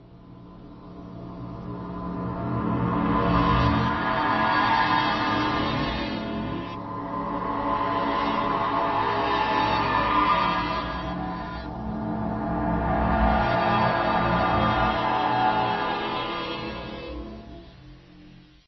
Discord Bell Metal Scream Reverse
Reverb backing on metal drum
Reverse, industrial, metal